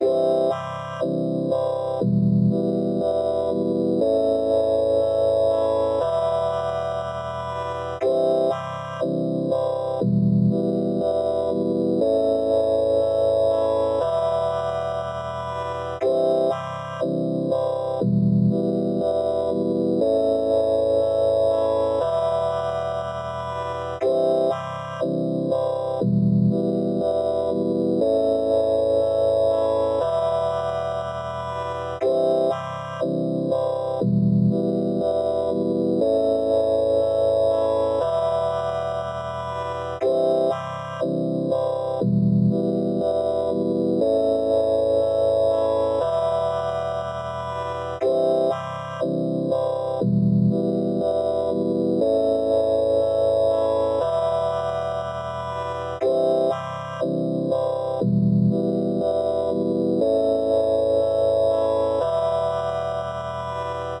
8 bit game loop 009 only organ long 120 bpm
120, 8, 8-bit, 8-bits, 8bit, 8bitmusic, bass, beat, bit, bpm, drum, electro, electronic, free, game, gameboy, gameloop, gamemusic, josepres, loop, loops, mario, music, nintendo, sega, synth